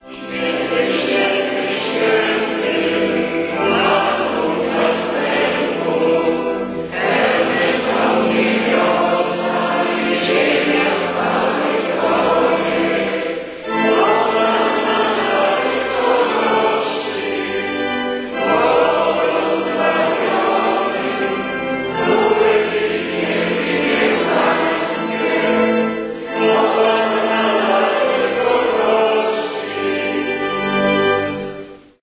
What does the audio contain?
before transfiguration030610
03.06.2010: the Corpus Christi mass and procession in Wilda - one of the smallest district of the city of Poznan in Poland. The mass was in Maryi Krolowej (Mary the Queen) Church near of Wilda Market. The procession was passing through Wierzbiecice, Zupanskiego, Górna Wilda streets. I was there because of my friend Paul who come from UK and he is amazingly interested in local versions of living in Poznan.
more on: